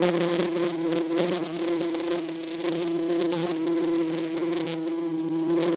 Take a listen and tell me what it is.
Bee flying loop
bee, sound-effect, loop, mono